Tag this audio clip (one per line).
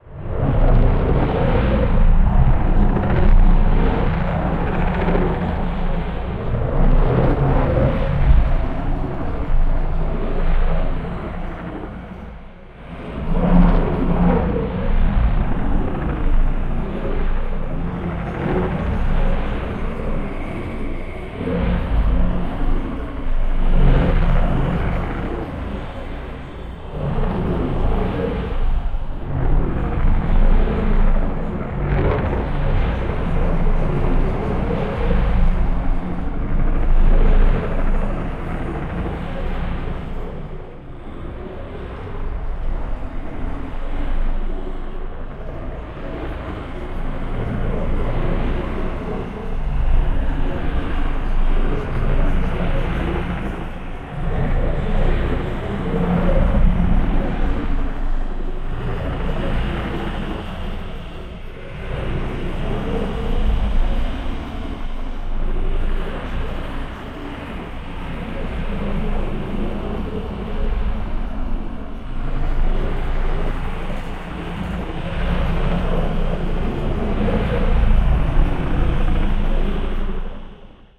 freaky
low
sfx
sound-design
sounddesign
strange
weird